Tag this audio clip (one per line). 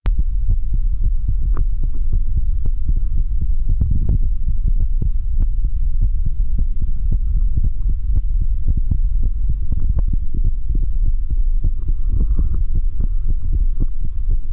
heartbeat
real
stethoscope